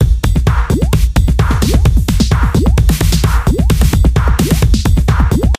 hip hop beat 21
sound song sample loop
beat, dance, disko, Dj, hip, hop, lied, loop, rap, RB, sample, song, sound